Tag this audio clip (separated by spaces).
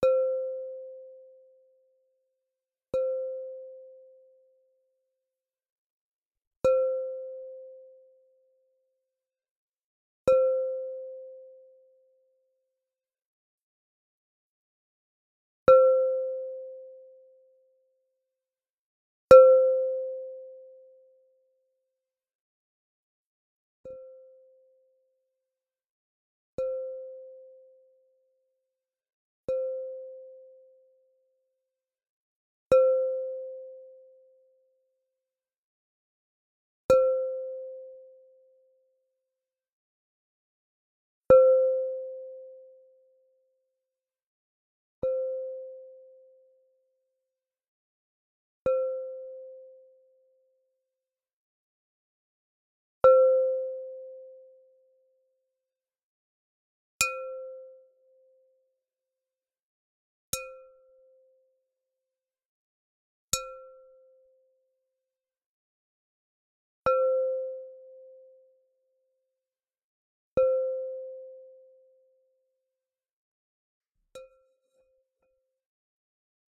bell-like bowl ceramic ceramics mid-side MS onesoundperday2018 percussion soft-hit tap